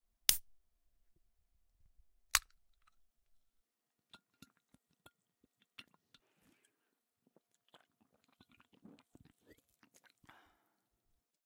opening a red bull can and taking a sip
drink, can, open, drinking, tin, food, soft-drink